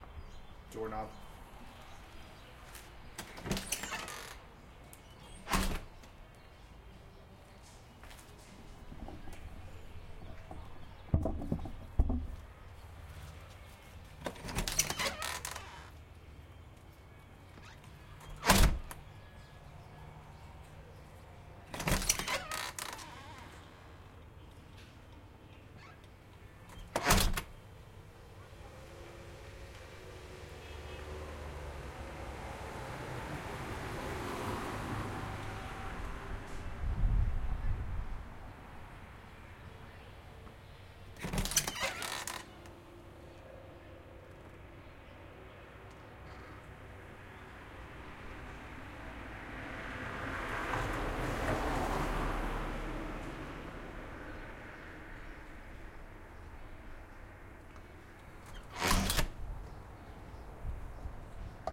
AAD Front Door Open Exterior 1
Birds, Close, Creak, Creepy, Door, House, Old, Open, Squeak, Traffic, Wood